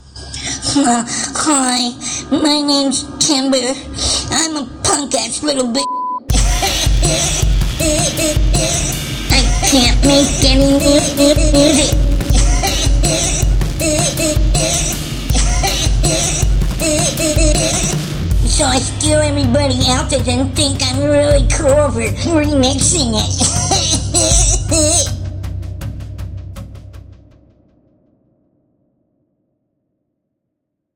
But seriously though
, this is just meant as a parody of sorts, I have personally nothing against anyone on this beautiful website. I laughed myself blue once I heard BaDoink's message to Timbre (Original contains very crude language, so be aware!), and I just couldn't help myself but to make this silly little thing. I don't have much in the way of proper music DAW software, so I find it difficult to make drum tracks of my own, therefore I had to borrow a nice one from tripjazz.
I made it in, like, only an hour or so, so the overall quality might only be OK at best, but I still hope you find it entertaining.
But closing statement; As I said, I have noting personally against anyone, and I think Timbre's work is excellent, and BaDoink's music and experimentation to be phenomenal. Have a great one, everyone!
Edited in Vegas Pro, as always.